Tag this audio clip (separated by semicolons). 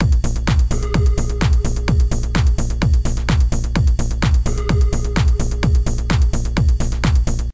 bass; beats; music; sound; trance